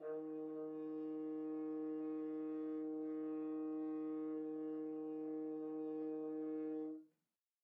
One-shot from Versilian Studios Chamber Orchestra 2: Community Edition sampling project.
Instrument family: Brass
Instrument: F Horn
Articulation: muted sustain
Note: D#3
Midi note: 51
Midi velocity (center): 31
Microphone: 2x Rode NT1-A spaced pair, 1 AT Pro 37 overhead, 1 sE2200aII close
Performer: M. Oprean
midi-note-51, single-note, muted-sustain, multisample, brass, vsco-2, dsharp3, midi-velocity-31, f-horn